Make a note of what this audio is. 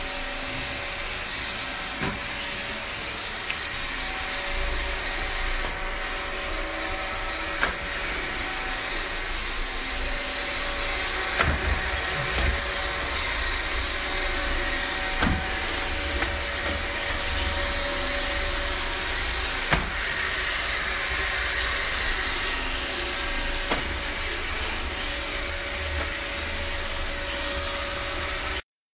Roomba Bumping Things
about 29 seconds of Roomba 630 bumping into tables and chairs, max -6.0db